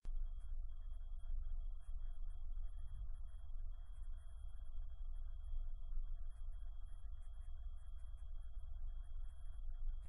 HDD IDLING 2
HDD Idling sound
HDD, Idling, sound